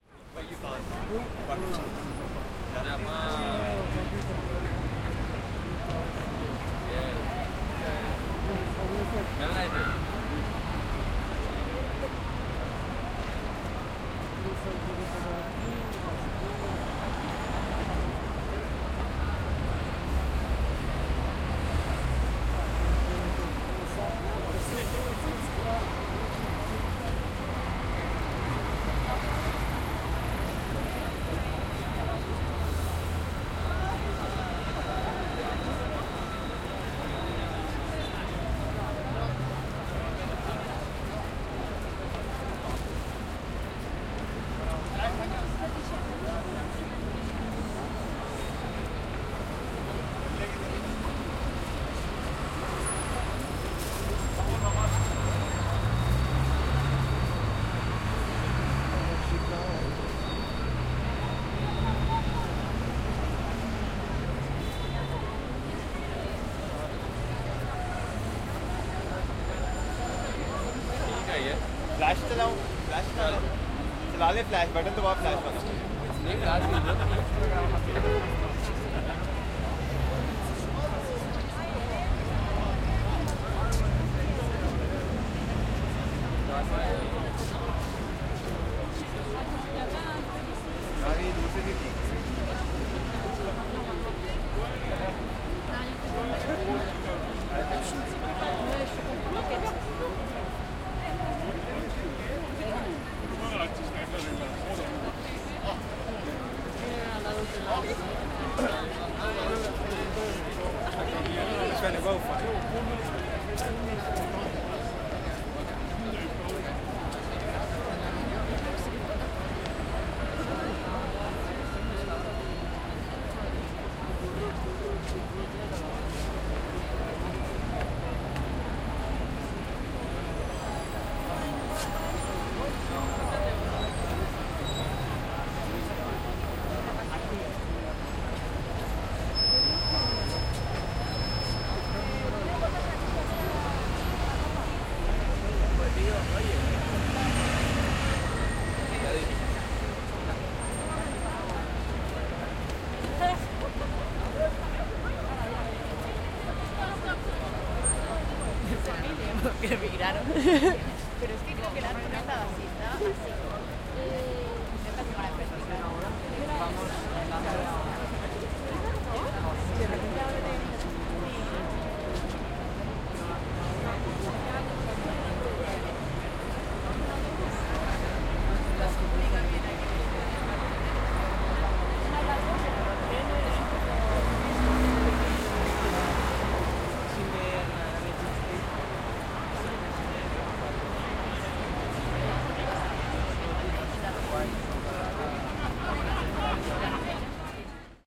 Recorded in the middle of Piccadilly Circus, London. Waves of heavy traffic passing, people passing, chattering in different languages
chatter, Piccadilly, chatting, chat, people, traffic